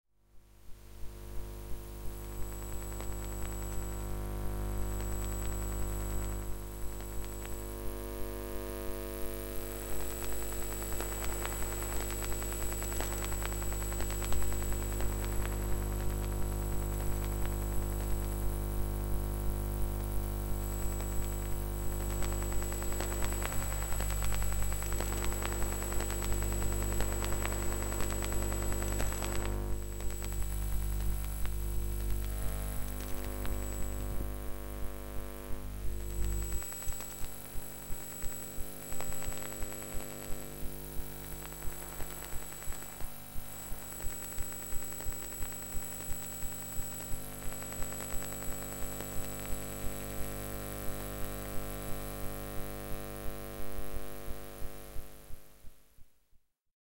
Recorded using a Zoom H1 and a Electromagnetic pickup